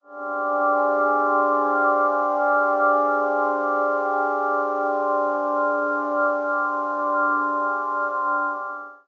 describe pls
oboe processed sample remix